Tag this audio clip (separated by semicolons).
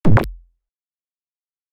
drum; bass